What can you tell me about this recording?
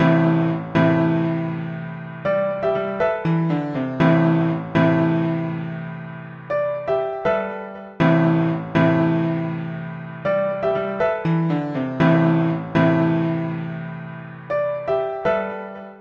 Short dramatic piano line. More piano loops to come as I experiment.
drama, dramatic, piano, piano-loop, salsa-piano